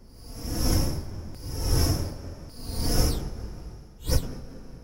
hmmmm, pulse wave setting..... and envelope's. w00t.